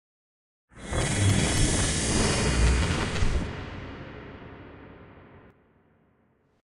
MORPH-CHANGE-SHIFT
A generic FX sound for anything warping, shifting, or changing.
change, FX, effect, woosh, warp, inside, forward, sci-fi, interior, reverb, shift, morph, backward